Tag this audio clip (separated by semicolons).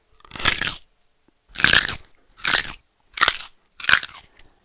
bite; biting; carrot; chomp; chomping; crunch; crunching; crunchy; eat; eating; food; vegetable